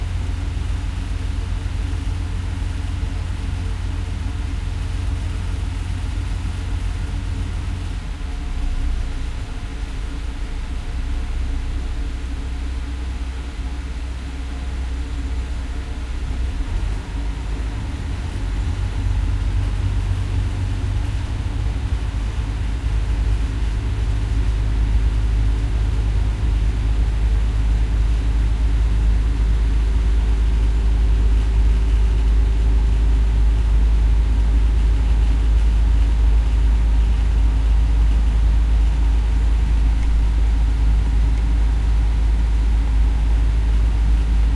boat inside
Sound of engine on a boat.
boat, engine, motor